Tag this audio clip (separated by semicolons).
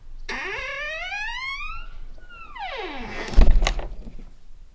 crackle; doors